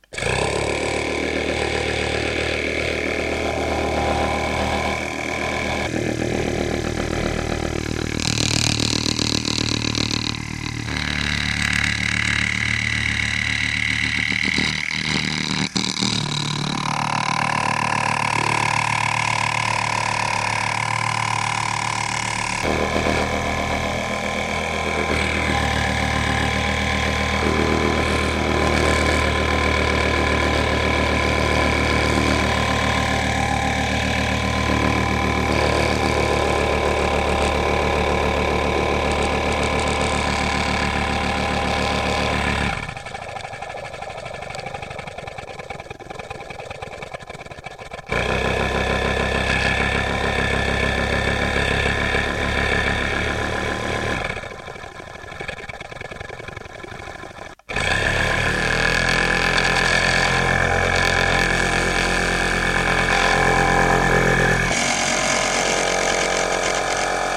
Smallest excavator working. One cylinder engine drives the hydraulic system. You hear varaiations of the sound depending on the load.
hydraulic, motor, engine, diesel, excavator